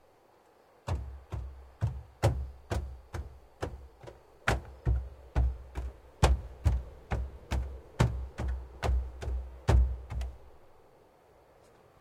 Sound of a person running on a wooden platform. Ambient sounds which also can be heard are the ocean and crickets in the background.
Recorded on the Zoom F4 and Rode M5's